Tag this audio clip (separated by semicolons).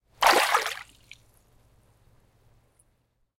flow; pool; small; swirl; water